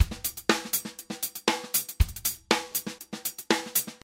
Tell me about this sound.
funk acoustic drum loops
acoustic, loops, drum, funk